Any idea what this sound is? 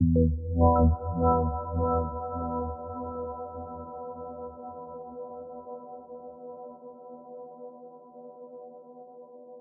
KFA19 100BPM
A collection of pads and atmospheres created with an H4N Zoom Recorder and Ableton Live